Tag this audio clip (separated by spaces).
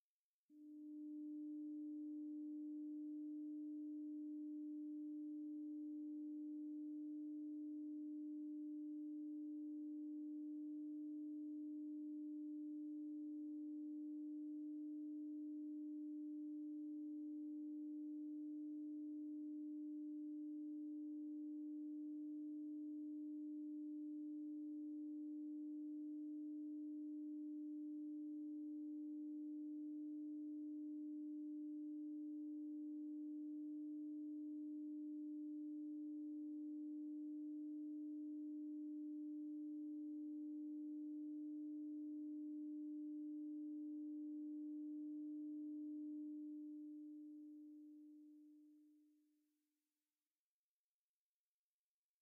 impulsion energy sound-design fx engine futuristic pad atmosphere ambient ambience future dark soundscape starship space drone